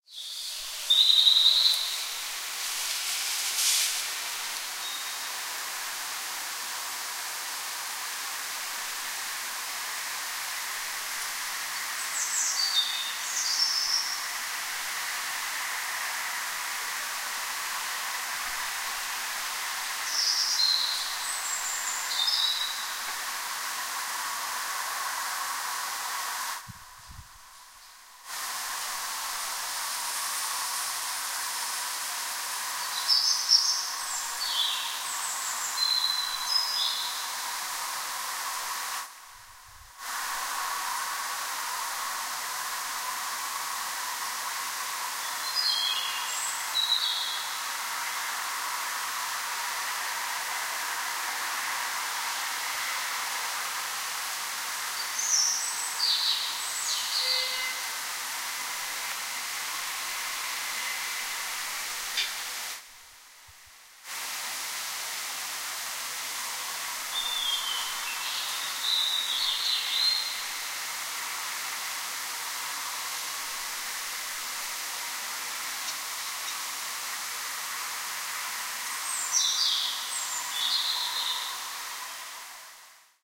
Oiseau nocturne rue du Volga Paris
A night bird singing in my small street rue du Volga, in the east of Paris.
There's a park here and they have energy all night since it's spring !
city, garden, nature, night-bird, paris, park